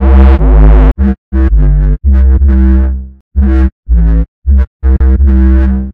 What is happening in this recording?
space bass 13
bass, bassline, drum-and-bass, hip, hop, jungle, loop, loops